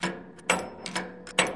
Sound taken during the international youth project "Let's go urban". All the sounds were recorded using a Zoom Q3 in the abandoned hangars U.S. base army in Hanh, Germany.
sampled, drone, effect, deep, recording, reverb, dark, fx, hangar, pad, experimental, sound-design, ambient, soundscape, germany, zoomq3